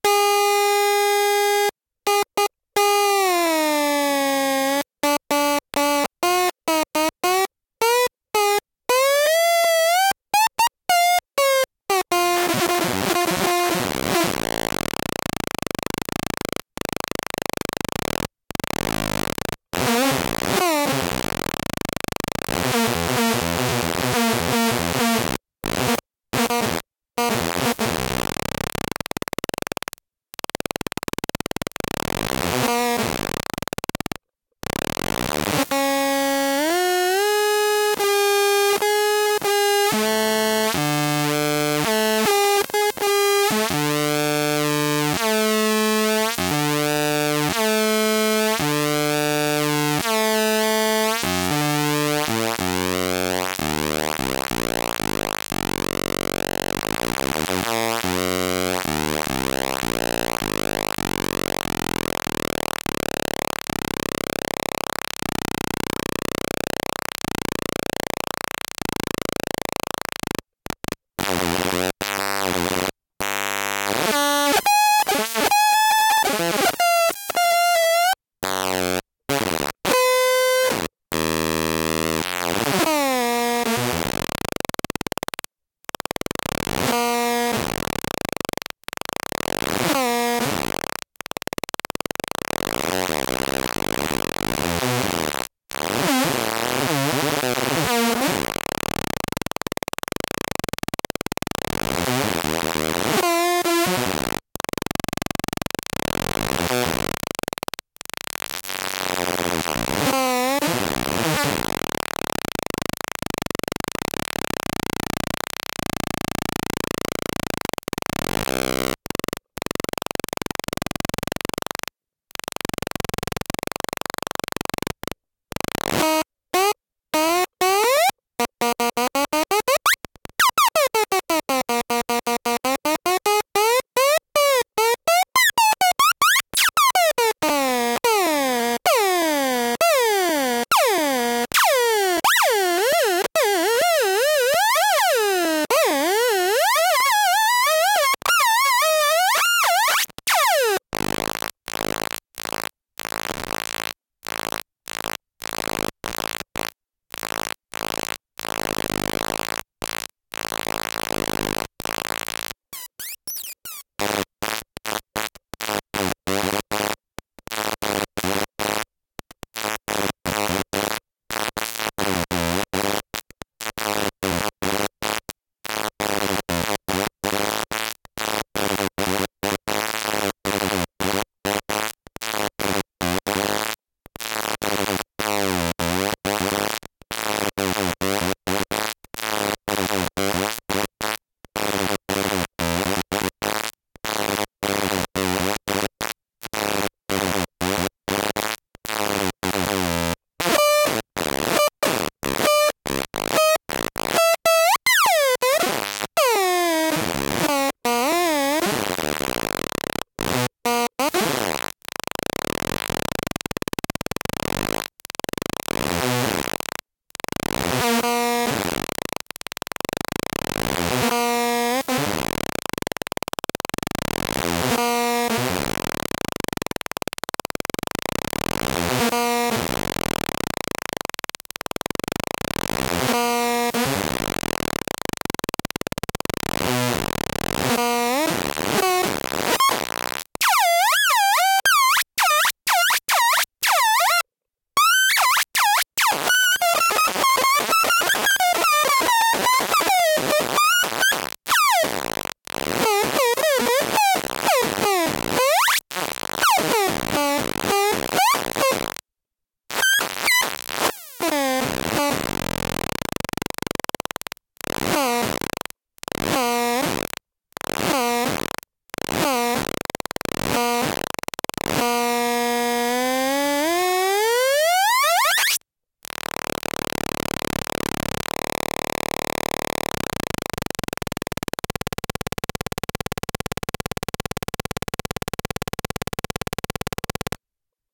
Playing around with an Atari Punk Console that a friend assembled.
Recorded with Zoom H2. Edited with Audacity.
bending, break, broken, chip, core, error, glitch, tune, tunes